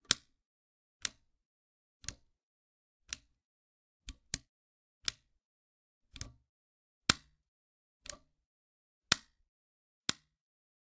Variations of a fuse box switch.